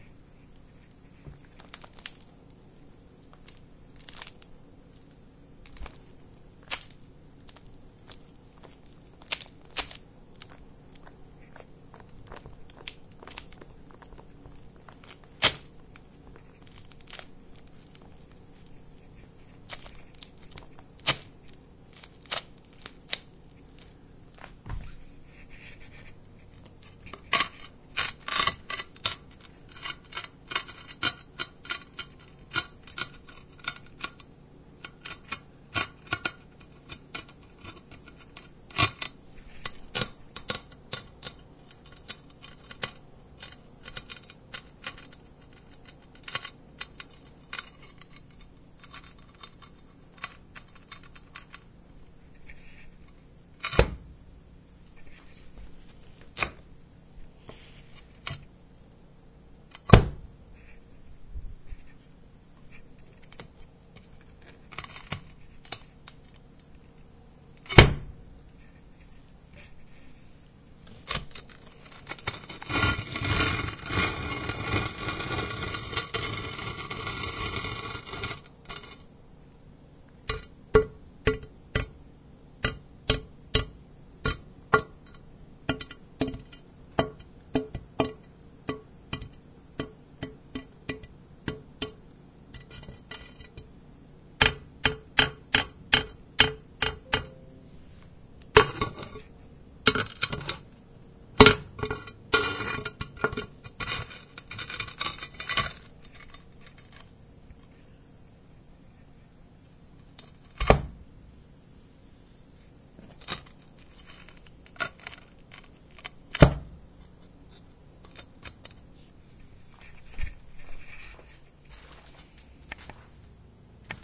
Floor Tile Scraping Concrete
This was recorded with a Trustin Portable Rechargeable 8GB dictaphone. The recorder was held about 7" away for the louder scrapes and drops and no more than 3" away with the softer scraping sounds.
Some normalization was used to drop the peaks.
The tiles were in the small hall of an apartment, facing an open door. The hall was approximately 3 feet wide and 8 feet long.
concrete, scraping